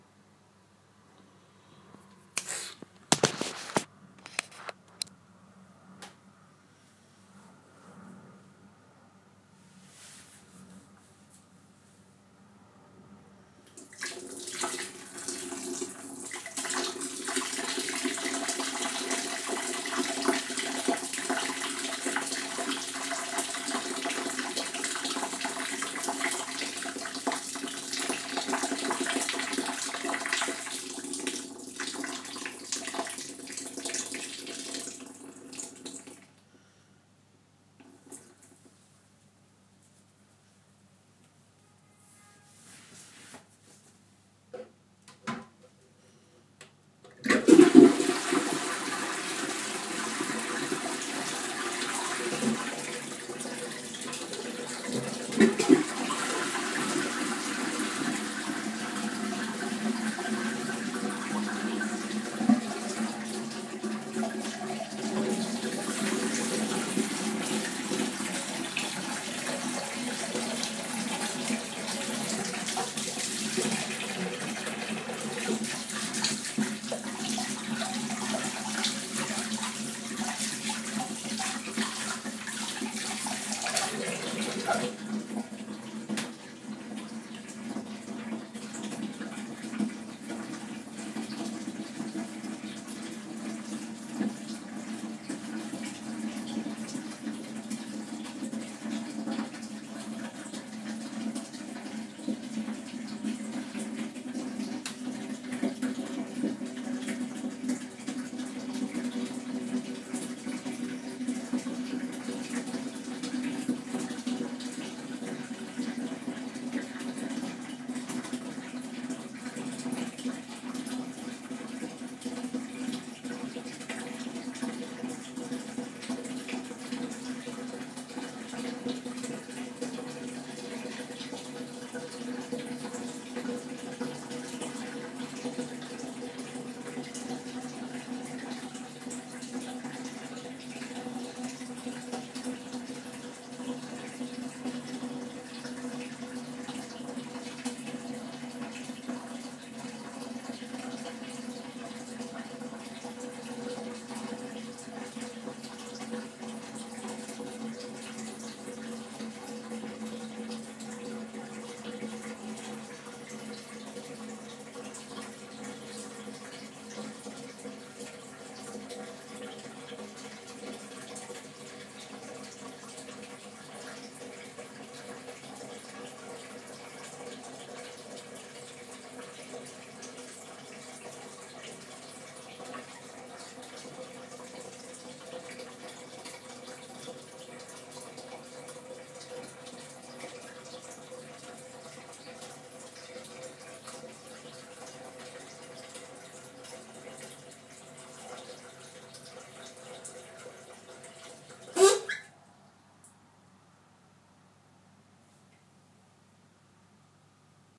Pee, flush, handwash
Me peeing, flushing the toilet and washing my hands
Recorded on an iPhone 4S with a Tascam iM2 Mic using Audioshare App